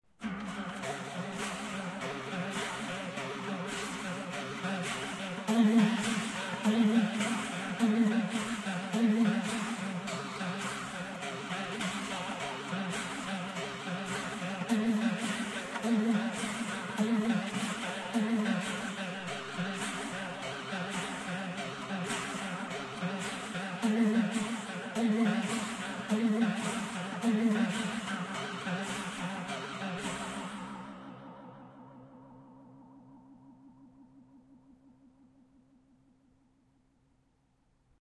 arpeggio by persian musical instrument cetar ....